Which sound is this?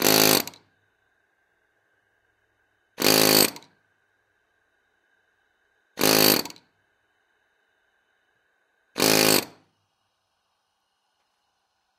Atlas copco rrc 22f pneumatic chisel hammer started four times.